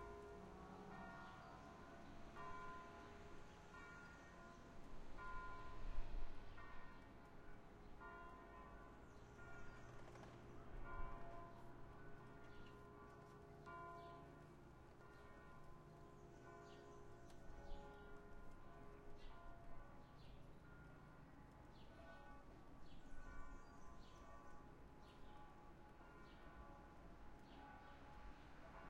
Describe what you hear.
Recording from where you can here three church bells from different location